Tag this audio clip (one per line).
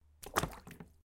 fall
low
splash
water